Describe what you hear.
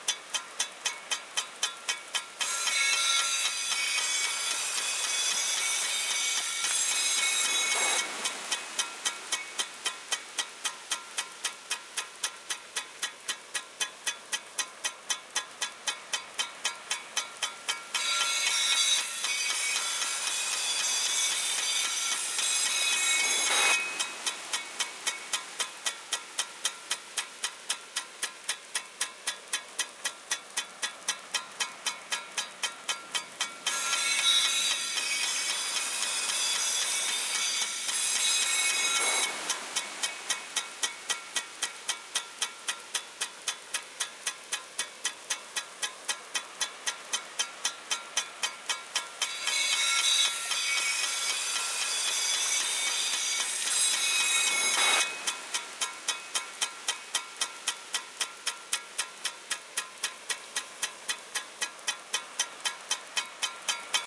sprinkler agricultural water spray1
agricultural, spray, sprinkler, water